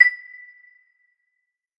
This is part of a multisampled pack.
The chimes were synthesised then sampled over 2 octaves at semitone intervals.
chime, metallic, one-shot, short, synthesised